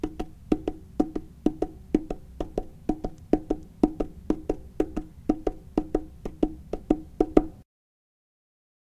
Pounding Tire
Pads of fingers hitting top of bike tire--like a horse running